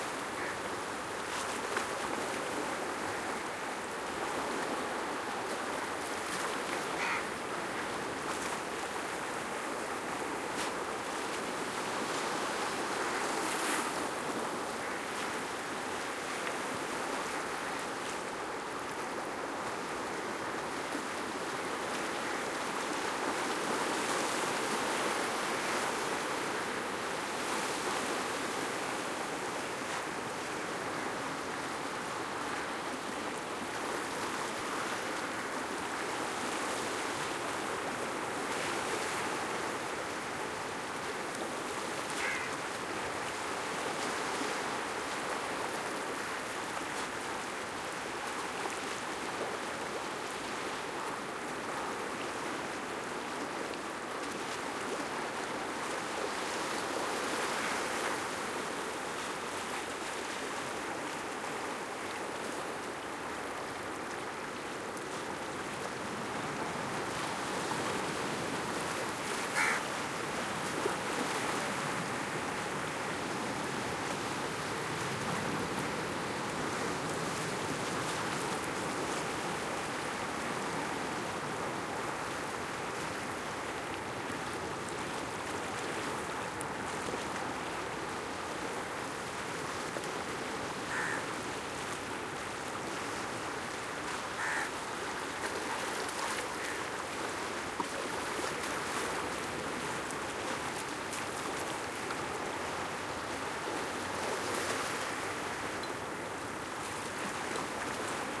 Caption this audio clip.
waves lake or ocean medium gentle splashing along low wall path to Ganpati shrine on water +distant ocean hiss2 movement like Scotland lake India
waves, lake, India, splashing, ocean, medium, water, or, gentle